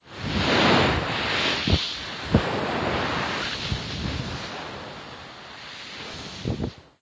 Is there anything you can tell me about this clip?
Viento helado
Audio, Clase, Tarea